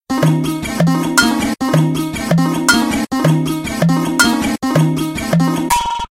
A Bollywood type sound i made
indian, drum